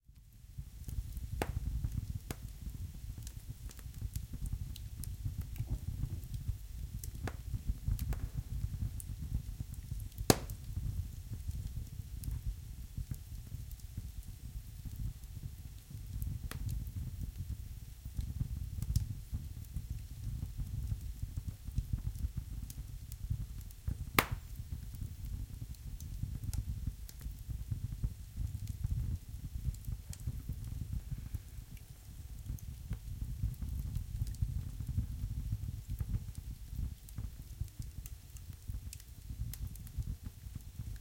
amb - fire mid
stove, fire, flame, crackle, combustion, fireplace, burning, flames, burn